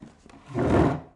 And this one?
Pulling Out Chair
A wooden chair being pulled out from a table.
Wood, FX, Chair